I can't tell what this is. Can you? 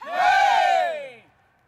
A group of people (+/- 7 persons) cheering and screaming "Yeeaah" - Exterior recording - Mono.